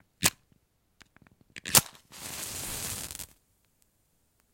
MatchBox - Strike and Light 03
fire, flame, light, matchbox